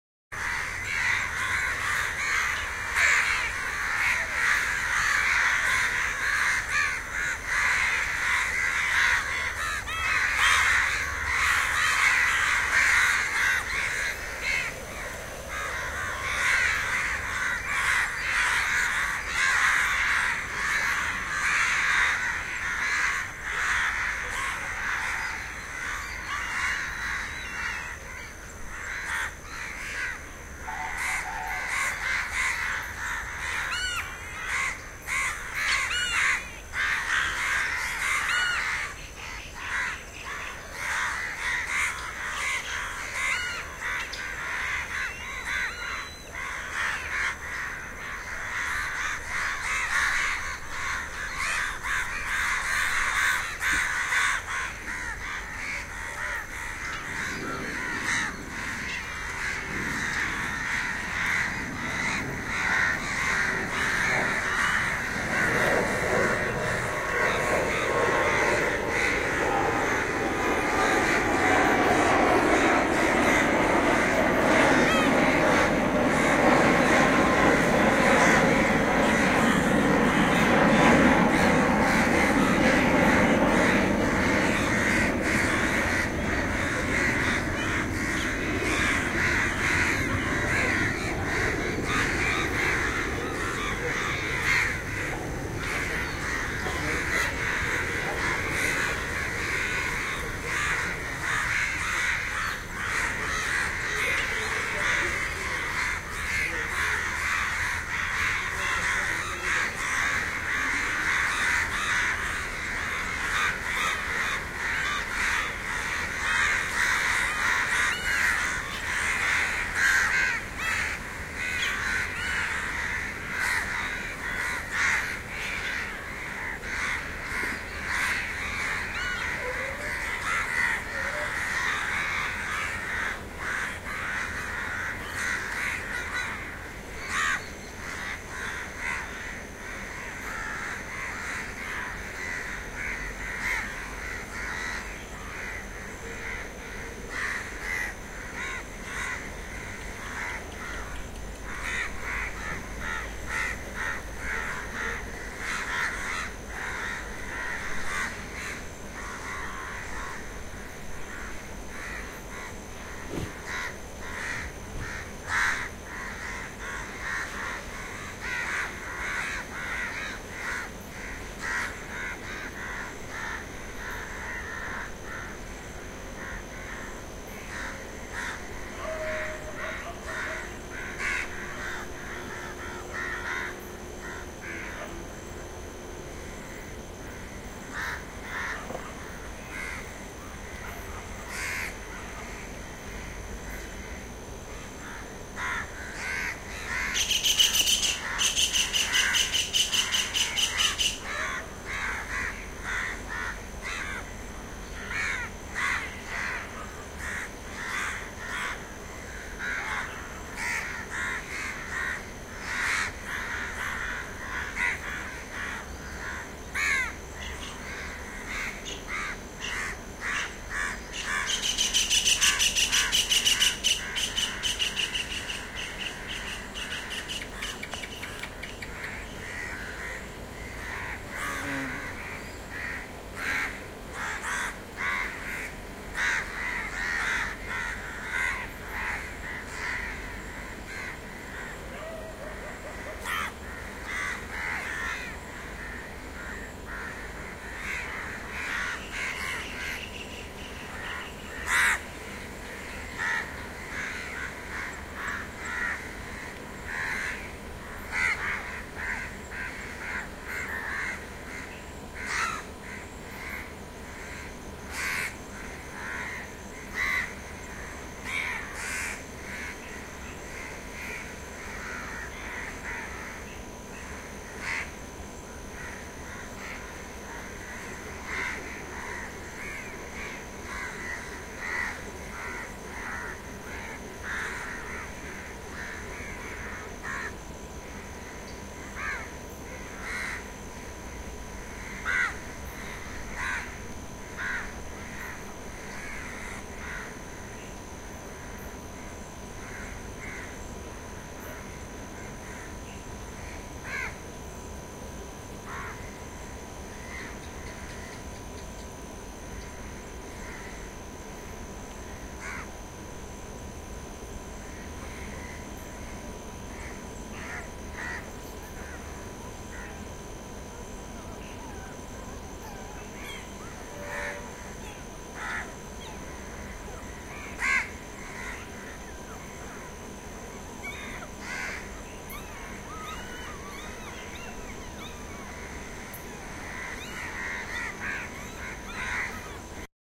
Crows Before the Rain / Airplane Pass
A murder of crows, loud, right before a rainstorm / an airplane passes overhead. Unintelligible voices in a room nearby. Crickets (Late Evening) Recorded on Zoom H6.
aeroplane, aircraft, airplane, birds, crickets, crows, evening, flight, flock, fly-by, insects, jet, late, leaves, murder, night, overhead, plane, rain, rustle, storm, stormy, tree, wind, windy